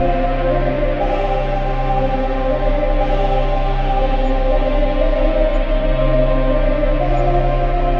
120 4b Nostromos Loop 09 C
Nostromos is a cinematic VST & AU plugin instrument for Windows and Mac OSX.